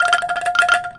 f4-bandung-angklung vib
toy angklung (wooden shaken percussion) from the Selasar Sunaryo artspace in Bandung, Indonesia. tuned to western 12-tone scale. recorded using a Zoom H4 with its internal mic.